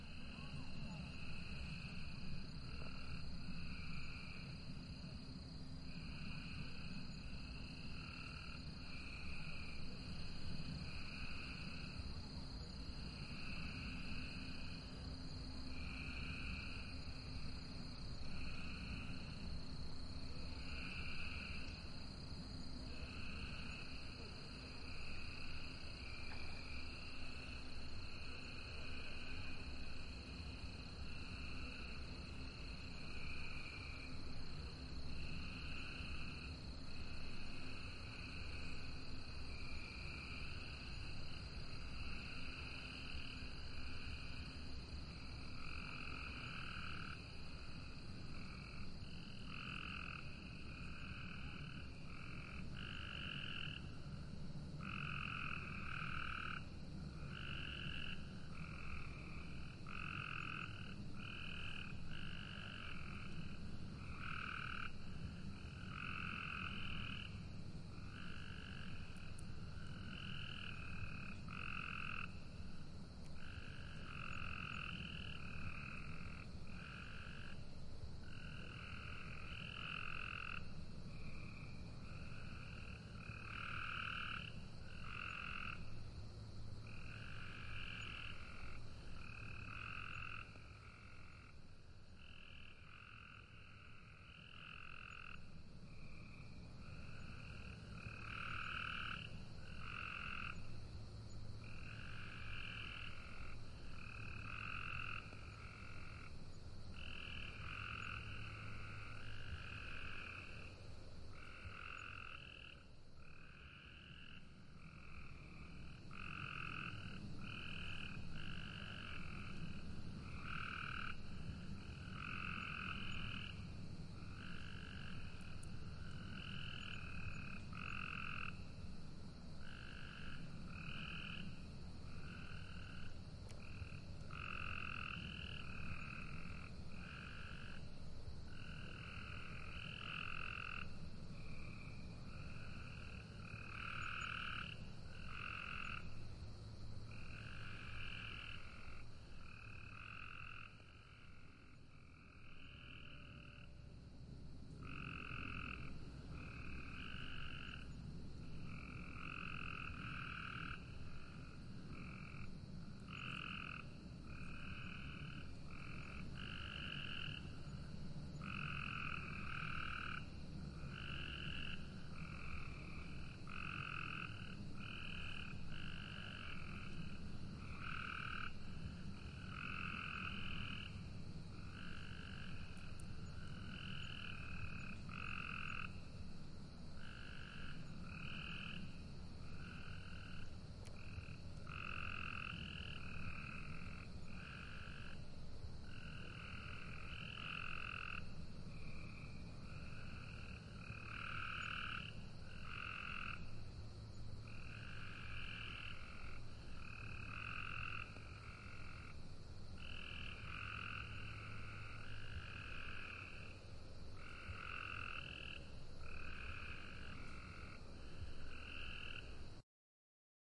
Frogs and Cicadas at Night in Tennessee
Frogs and cicadas recorded on McBee Island Farm near Strawberry Plains in East Tennessee, USA.
atmosphere, cicadas, field-recording, frogs, insects, nature, night, nighttime, tennessee